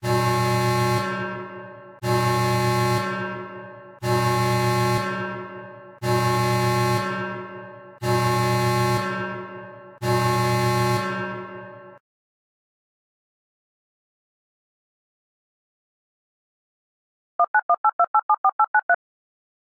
A 6 segment alarm/warning akin to the futuristic sound you might hear on a space vessel.
alarm, Electronic, metallic, pulsing, warning